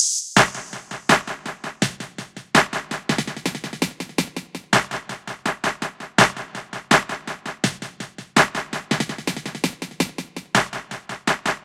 This is a small Construction kit - Lightly processed for control and use ... It´s based on these Broken Beat Sounds and Trip Hop Flavour - and a bit Jazzy from the choosen instruments ... 165 bpm - The Drumsamples are from a Roality free Libary ...